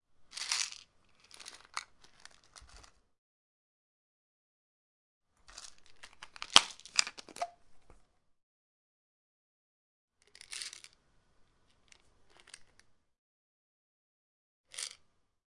drugs foley
medicines (tablets) in a plastic pot
tablets, drugs, medicines